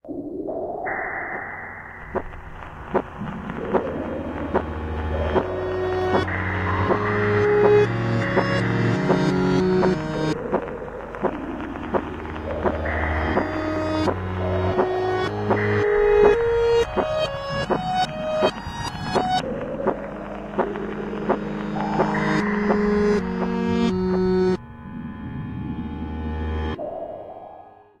This is maybe what the heart of the universe would sound like.
Made with Grain Science app, edited with WavePad.
heartbeat, universe